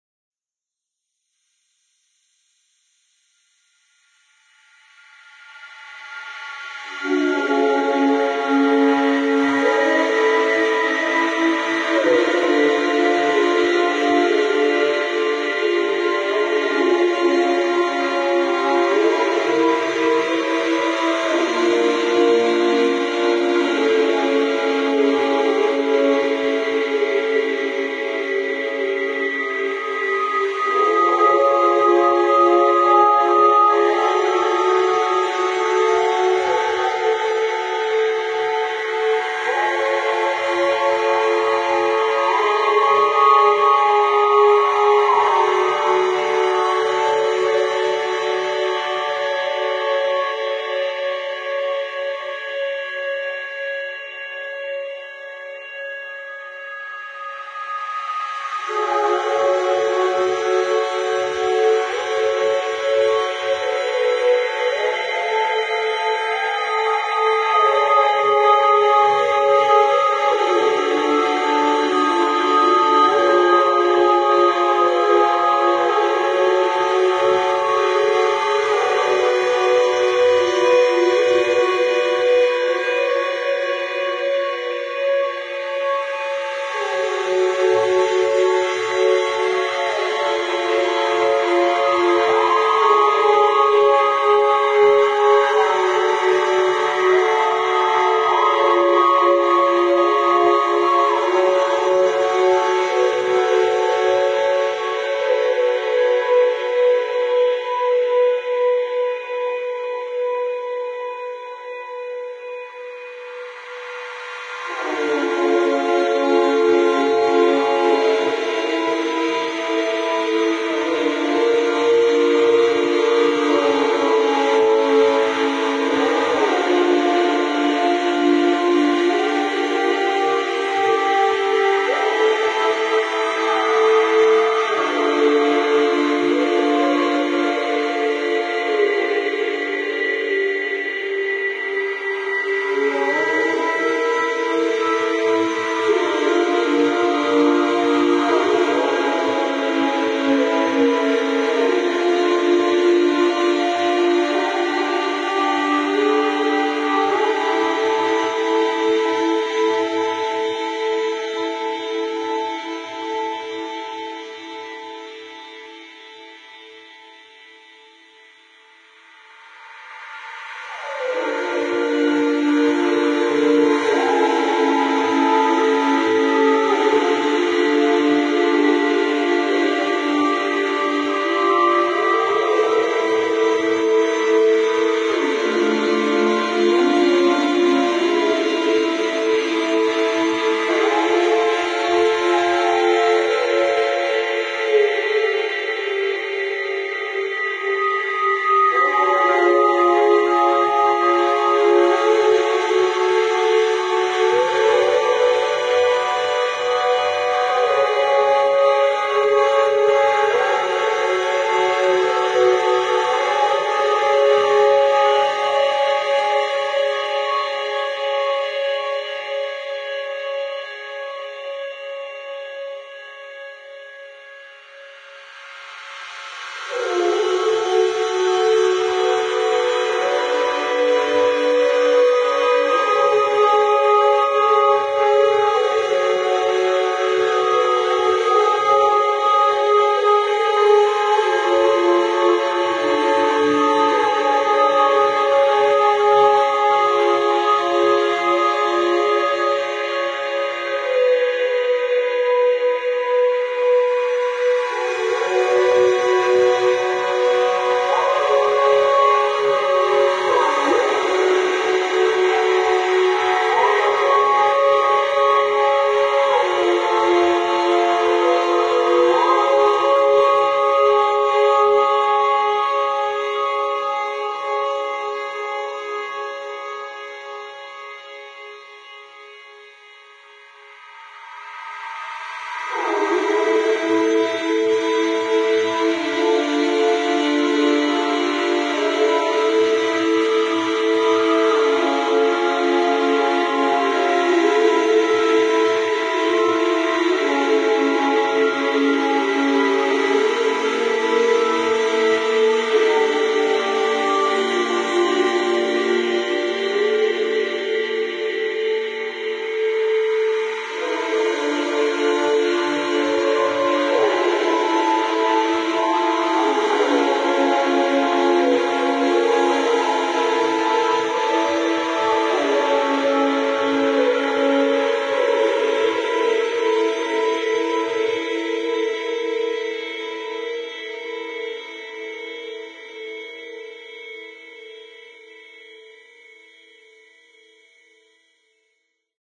Similitude of a few singers and unidentifiable instruments performing an odd tune, with some very bright reverse reverb. This is output from an Analog Box circuit I built. This one just isn't as interesting, musically, IMO. Totally synthetic sounds created in Analog Box but finalized (including the reverse reverb) in Cool Edit Pro.